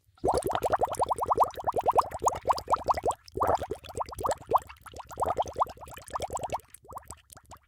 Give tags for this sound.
liquid
bubbling
boiling
bubbly
underwater

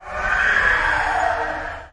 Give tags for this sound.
alien
dinosaur

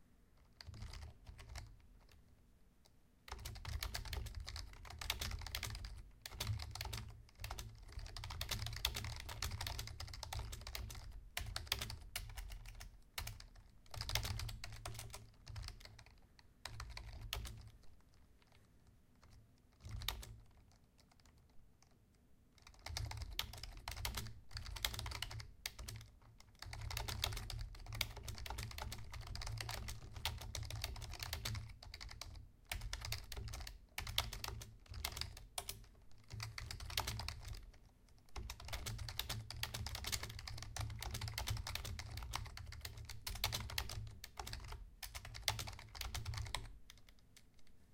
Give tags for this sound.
computer
foley
office-sounds
soundfx

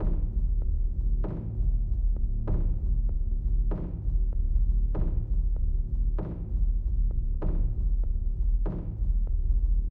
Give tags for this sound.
groovy
beat
drum-loop
loop
rhythm
drum
sample

